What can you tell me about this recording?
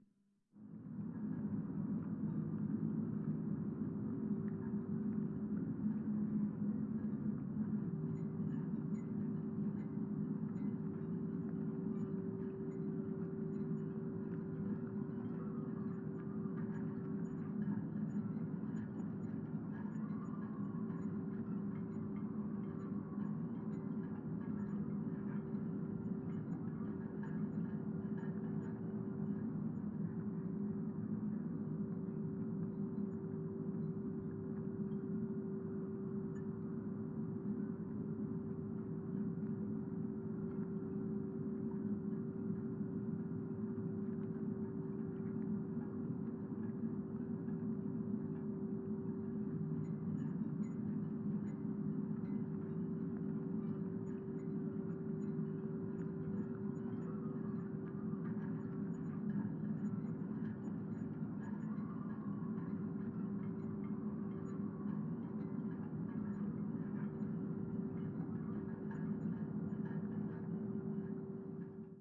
A surreal atmosphere, maybe a nightmare. A psychedelic atmos enhancer.
This sound is part of the Weird Roomtones and Silences soundpack - a compilation of synthetic ambiences and silences meant to enhance a neutral atmosphere in the desired direction. The filenames usually describe an imaginary situation that I imagine would need the particular roomtone, hardly influenced by movies I've watched.
------You can use the soundpack as you wish, but I'd be happy to hear your feedback. In particular - how did you use the sound (for example, what kind of scene) and what can be improved.
Thank you in advance!